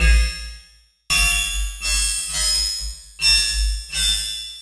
hi frequencies robotic and metallic sound taken from vectrave an experimental virtual synthesizer by JackDarkthe sound was heavily processed with concrete fx Lowbit[part of a pack called iLLCommunications]
alien, analog, bleep, cartoon, commnication, cyborg, data, digital, effect, extreme, film, future, fx, glitch, heavy, info, lab, metallic, metamorphosy, movie, mutant, robot, sci-fi, scoring, signal, soundeffect, soundesign, soundtrack, synth, synthesizer